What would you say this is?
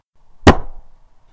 Plastic Bottle or Container Slam
Manipulated to sound like placing a giant container holding liquid onto a desk.
*This was made to sound like placing a giant hand sanitizer bottle on a desk
bottle, container, drop, place, plastic, slam